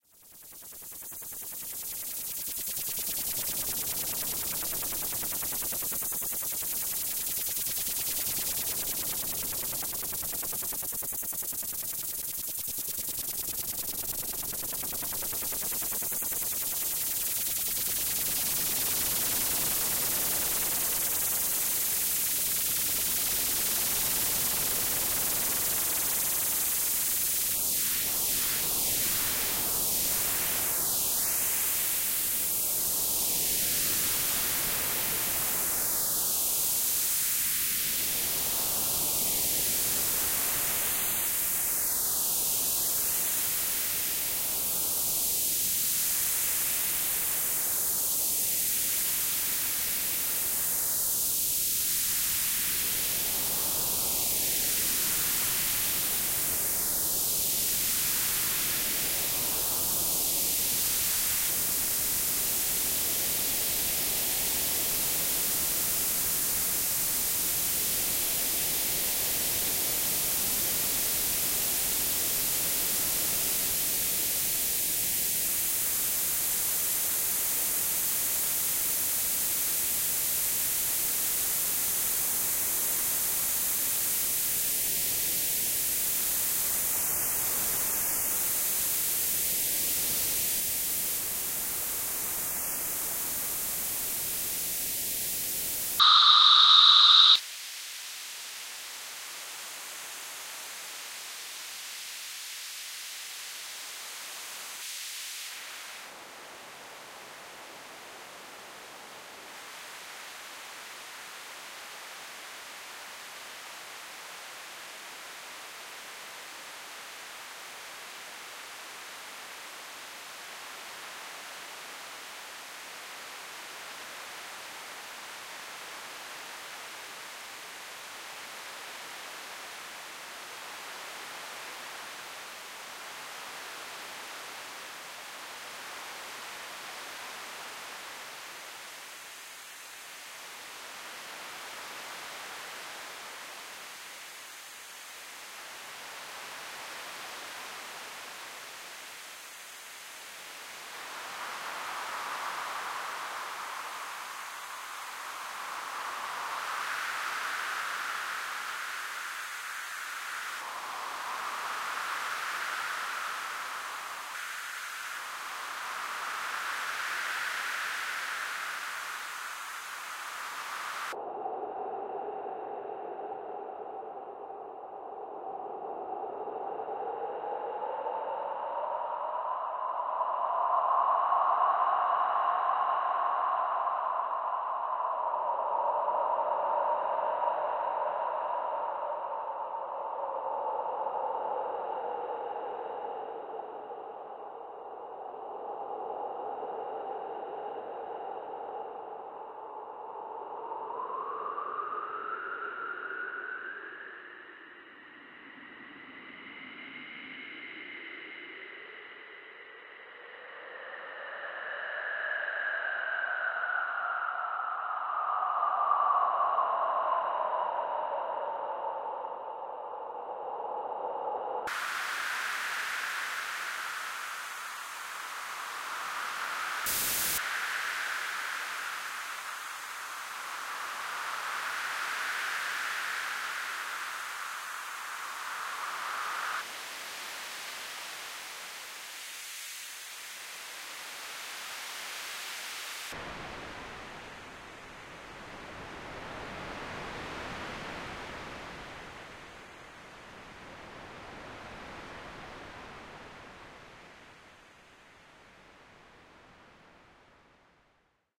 testing floiseflower
Testing new plugin on-the-go. Floise Flower is our new powerful tool (standalone/VST) for manipulating sound spaces. Works lovely with noises.